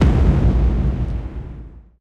BOOM IMPACT 2
boom, dark, design, explosion, gamescore, impact